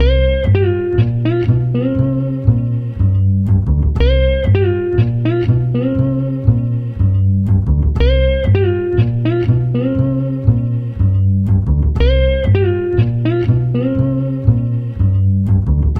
Jazz Guitar Loop
A short loop featuring a jazzy guitar with walking bass background. Made with samples in Logic Pro for a school project.
bass, guitar, jazzy, loop, music